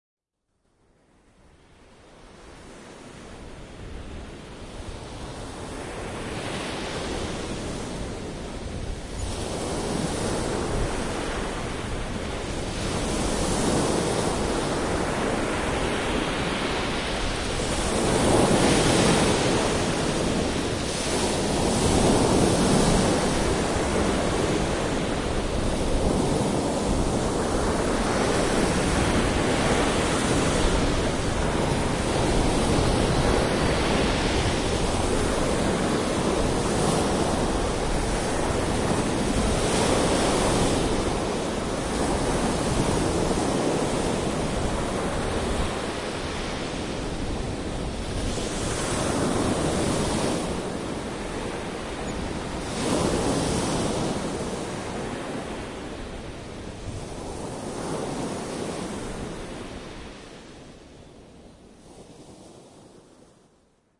Water Miami beach Atlantic
Recording of the waves as they crash ashore on miami beach.
Recorded with sony MiniDisk and mastered in Logic 7 pro
atlantic, beach, competition, miami, ocean, recording, water